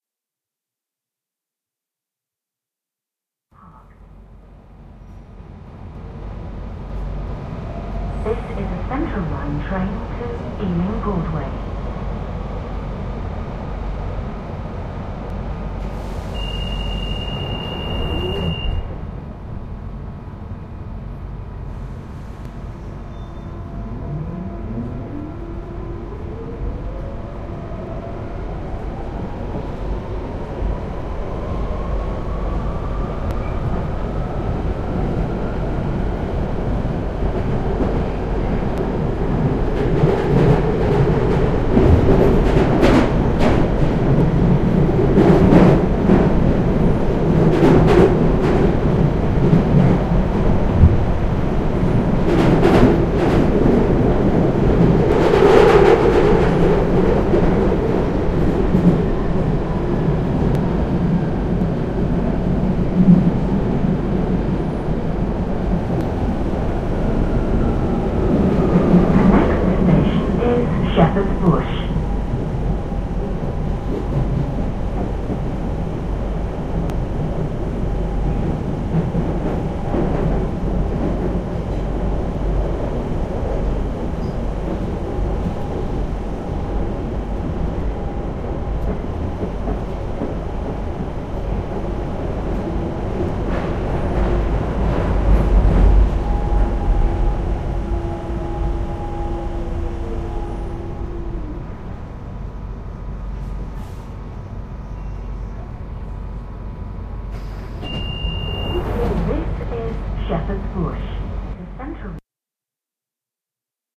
Central Line to Ealing Broadway
Recording of the train from central London out West to Ealing. Includes the recorded announcements and closing-door chimes, and the noise of the rails. Ends as the train stops at Shepherd's Bush.
london, london-underground, subway, train